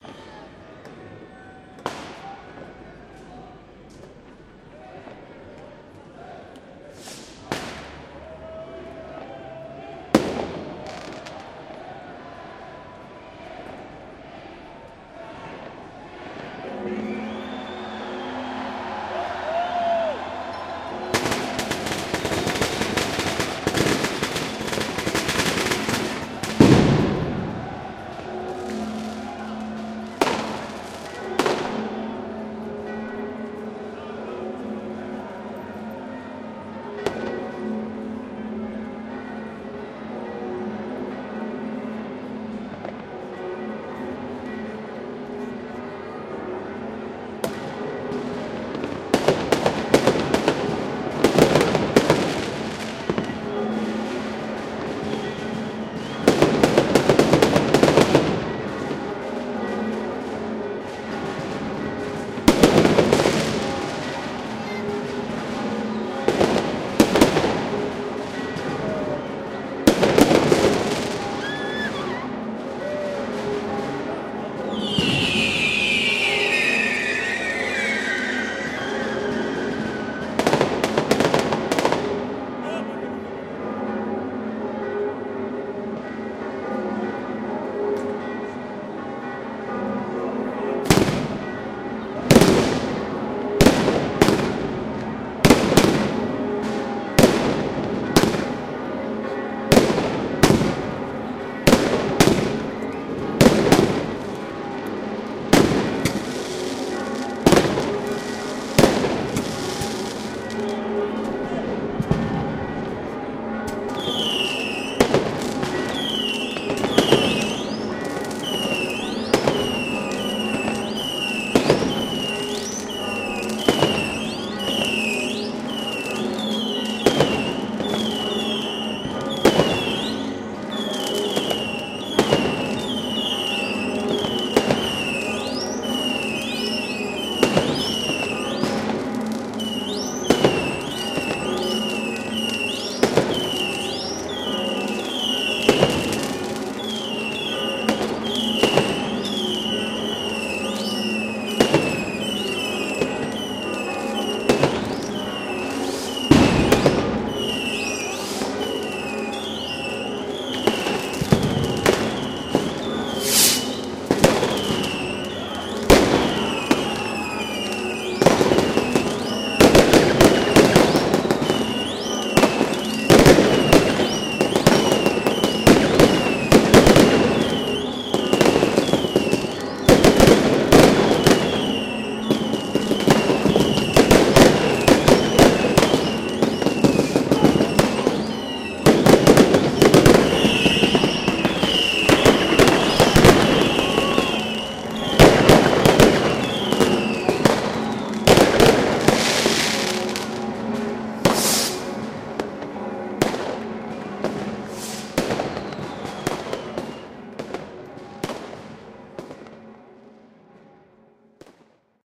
New years eve in the old city of Utrecht, Netherlands. People gathered on the square near the Dom church, counting down and the bells start tolling, cheering and laughter when the new year has begun. After that everyone starts lighting their own fireworks. Captured with Zoom H2, dynamically processed.
bells, utrecht, firework, dom-tower, new-year, people, fireworks